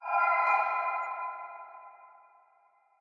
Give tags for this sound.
sfx; journey; door; treasure; game; cave; open; finding; quest

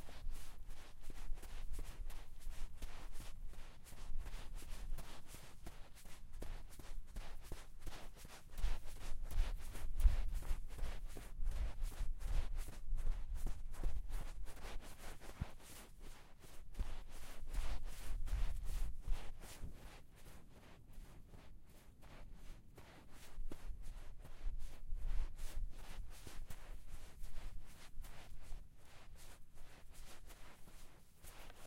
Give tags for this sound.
beach; running; sand; sneakers

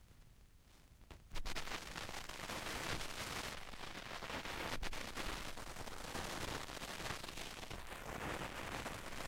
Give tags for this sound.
pop; crackle; dust; turntable; vinyl; noise

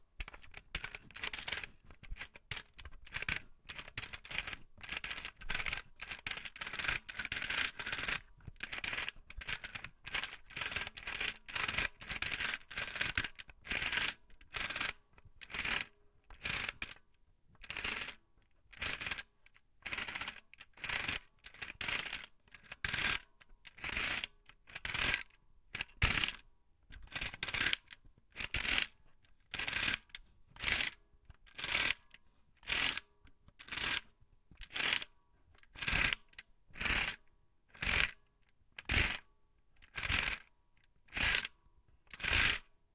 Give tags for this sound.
metal; metallic; spring; tin; toy

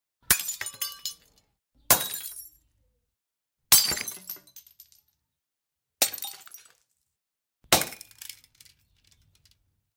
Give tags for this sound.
break; glass; smash; window

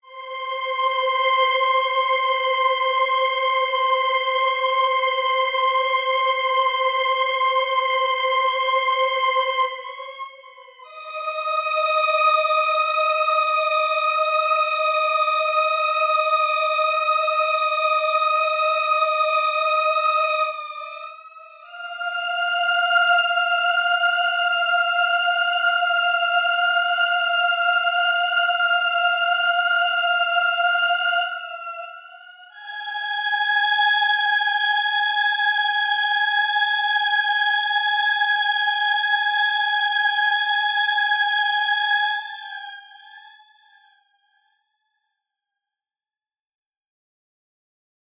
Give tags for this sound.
bogey; creepy; dramatic; goodhorror; haunted; horror; macabre; movies; nightmare; scary; scarygames; scarymovie; scarytvseries; sinister; sound; soundeffects; spooky; suspense; terrifying; terror; thrill; weird

